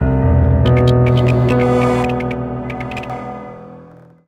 Futuristic Haunted Piano
Futuristic dark evil piano with glitches.
approaching, beat, dark, evil, future, futureistic, futuristic, fx, glitch, haunted, haunting, idm, piano, planetary, sound-effects, space, spacy, spook, spooky, star, stars, threat, threatening, threatning, war